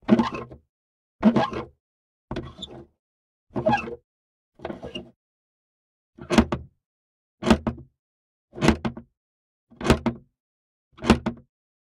Opening (x5) and Closing (x5) a Metal Chest.
Gear: AKG C411